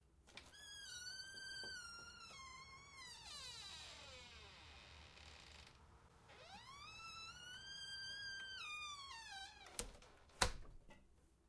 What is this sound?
Door opening and closing 5
Extremely squeaky door being opened and shut, with audible outside ambience when open.
open, doors, creaking, wood, creak, door, outdoor, squeak, squeaky, wooden, opening, close, closing